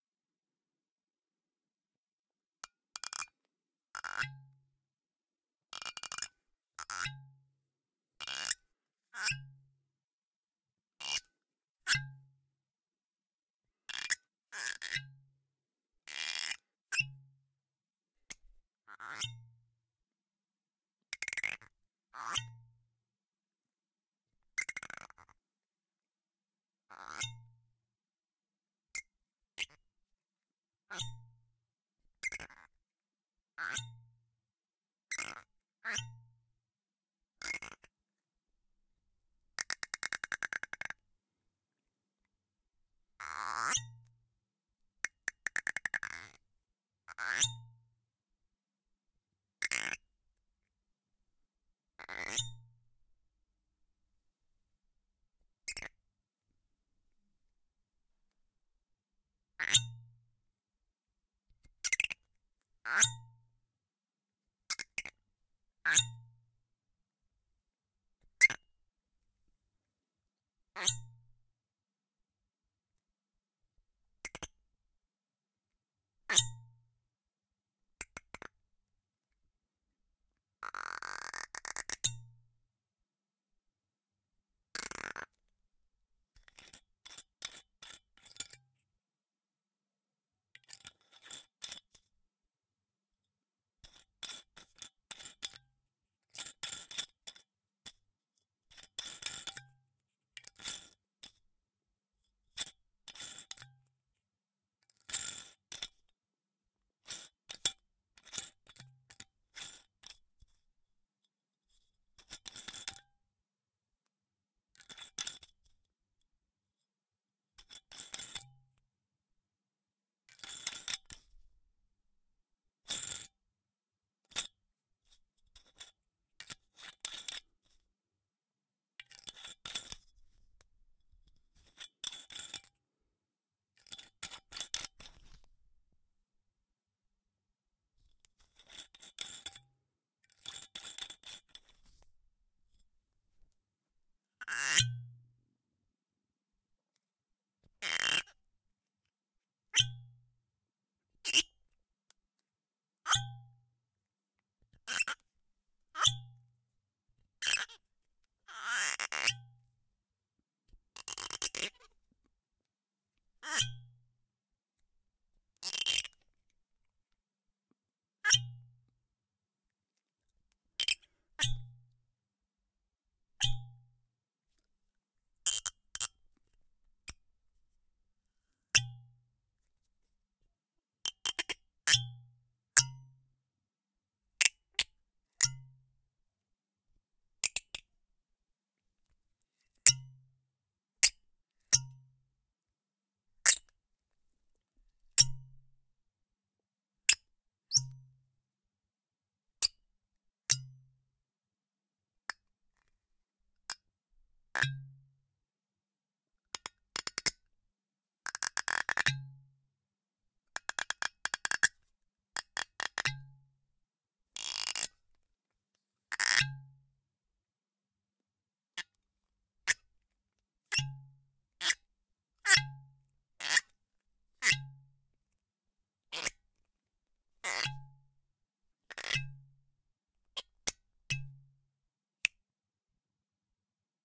AKG C214 condenser mic, mackie ONYX preamp, AD Cirrus Logic converter. Corking and uncorking of scotch whiskey. Macallan, Lagavulin, Singleton, Scapa, Johnnie Double Black. 20-30cm from mic